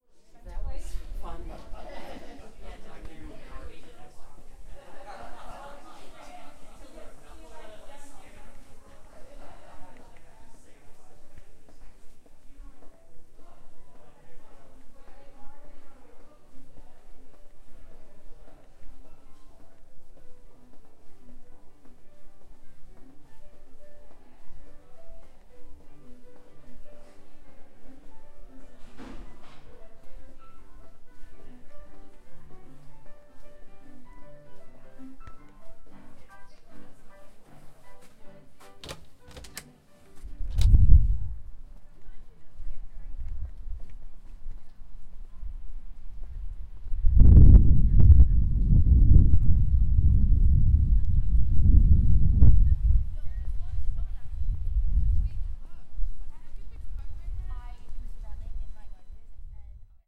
This recording is a sound-walk exercise for my class. This sound-walk starts within a music building to the outside of the building.